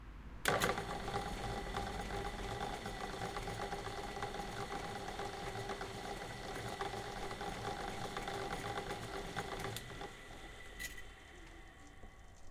old drill press being switched on let run and switched off
recorded with a zoom h6 stereo capsule
mechanical, noise, industrial